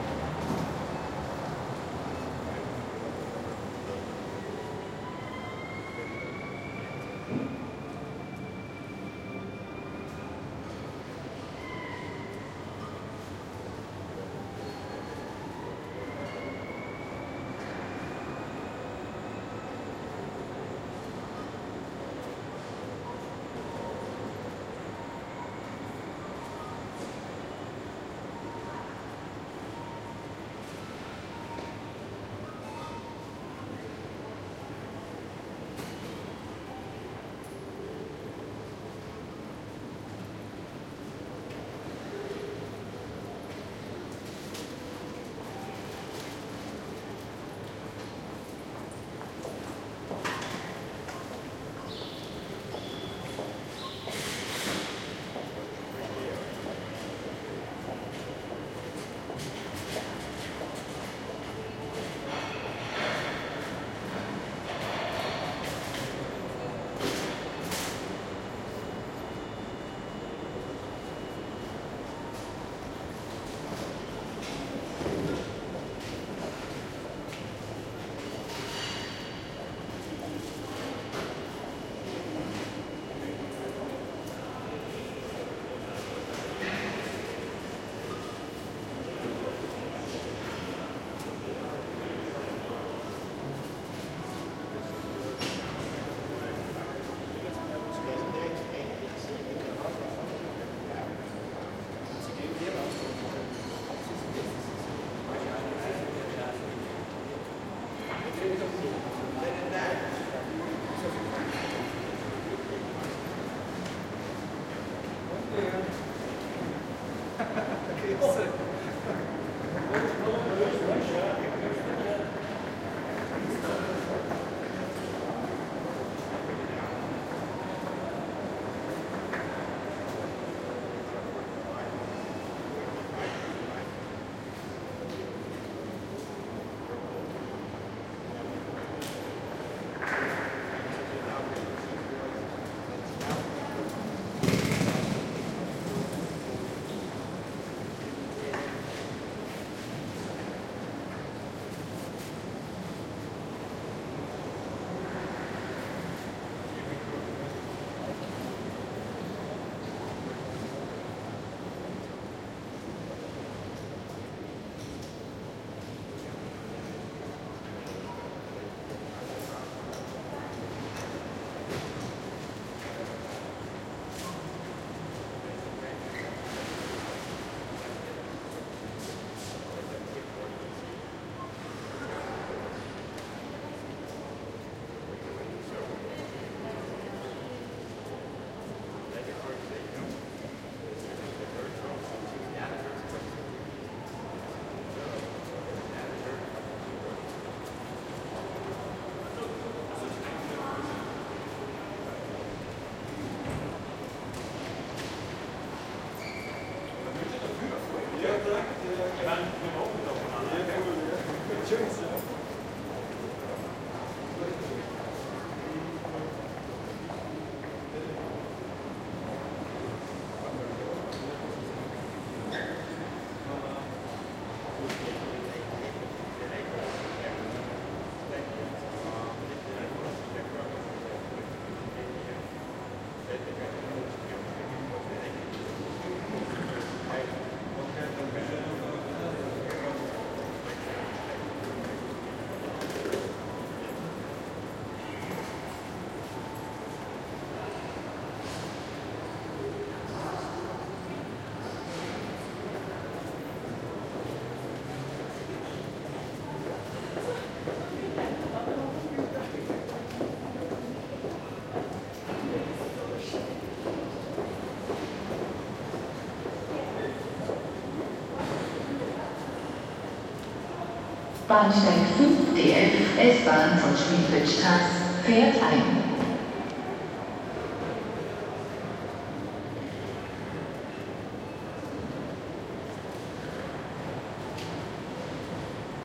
140814 Graz MainStationInt F
Surround field recording of the concourse in the main railway terminal in Graz/Austria. Trains can be heard arriving and leaving in the background, people talking and walking in the mid and near field. At the end of the recording (4:31) an arriving train is announced on the PA system.
Recorded with a Zoom H2.
These are the FRONT channels of a 4ch surround recording, mics set to 90° dispersion.
public, busy, footsteps, PA, urban, hall, people, interior, city, field-recording, train, Europe, ambiance, railway, Graz